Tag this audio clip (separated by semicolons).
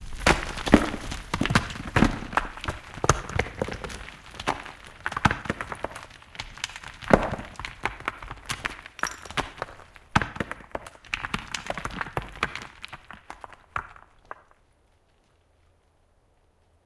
avalanche; stones; field-recording; rocks